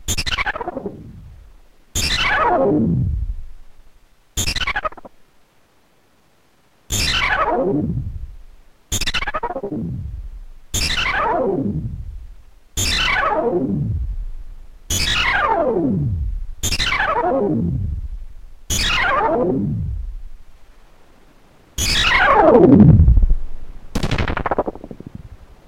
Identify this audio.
Series of interesting descending bleeps made by circuit-bent Yamaha RX17 drum machine feeding Lexicon MPX 100 fx unit with feedback loop.